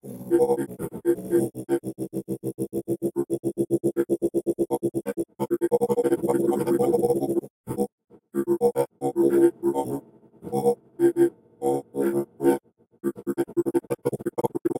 granular synthesizer tibetan monk
This is a processed waveform of tibetan monk. I made it with fruity loops granulizer. Enjoy :)
freaky, synth, synthesis, sfx